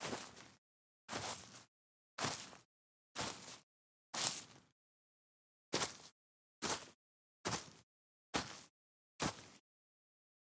Footsteps sequence on Frozen Grass - Mountain Boots - Walk (x5) // Run (x5).
Gear : Tascam DR-05